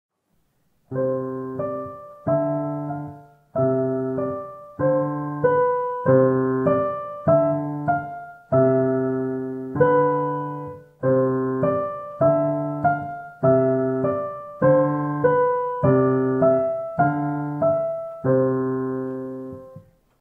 Sample song 6
A school project piano sample!
6, piano, sample, song